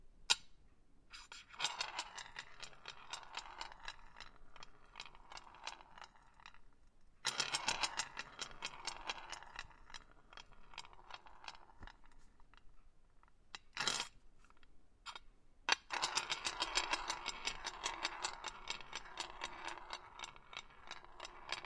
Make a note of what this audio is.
chess pawn rolling in glass
Recorded with a Zoom H2. This is a chess pawn rolling over a glass table. The movement is produced by the hand, providing different paces and forces.
glass, scrapping, pawn, field-recording, chess, movement, rolling